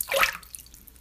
A slosh of water i made by moving a spoon through a bowl of water with thicker broth in it.
I used this for water footsteps in my game Lilly but i put this up for others to use.
Slosh, splash, splashing, water